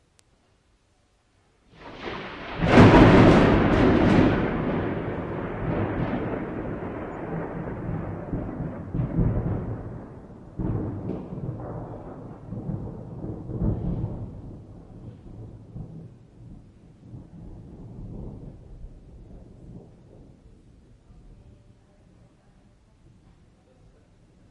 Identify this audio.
Thunderclap at night
The title of this track says it all. The first thunderstorm in 2007.
I just managed to record this single thunderclap, as it was only a short
thunderstorm and it took a small while to get the gear ready. I used a Sennheiser MKE 66 microphone.
thunder, field-recording, environmental-sounds-research, lightning, thunderclap, ringtone